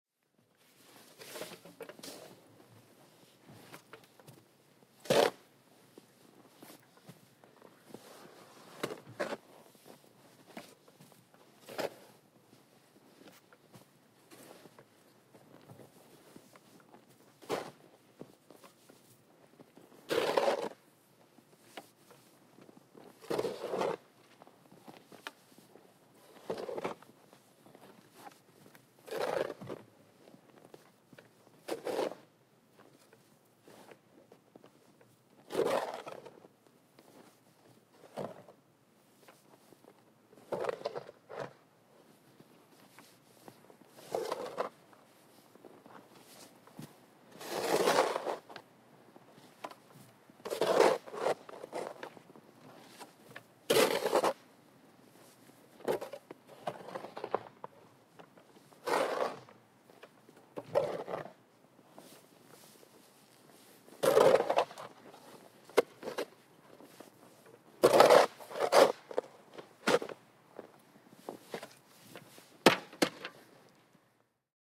Shoveling snow at a silet parking lot. Recorded with a Zoom H1.